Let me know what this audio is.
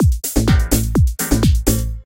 house loop 1
house loop houseloop
loop, houseloop, house